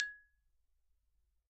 Kelon Xylophone Recorded with single Neumann U-87. Very bright with sharp attack (as Kelon tends to be). Cuts through a track like a hot knife through chocolate.